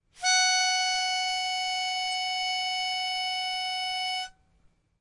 Guitar Pitch Pipe, F-sharp4
Raw audio of a single note from a guitar pitch pipe. Some of the notes have been re-pitched in order to complete a full 2 octaves of samples.
An example of how you might credit is by putting this in the description/credits:
The sound was recorded using a "H1 Zoom V2 recorder" on 17th September 2016.
4, F-sharp, G-flat, Guitar, Instrument, Pipe, Pitch, Sampler